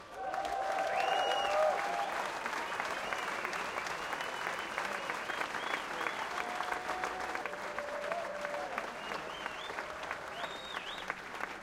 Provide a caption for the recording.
090402 00 frankfurt people aplause
aplause after small concert
people, frankfurt, aplause